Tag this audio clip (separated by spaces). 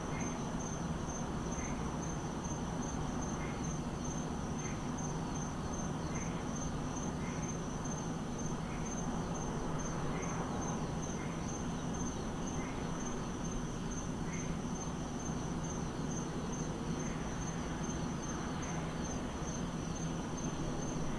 ambience atmosphere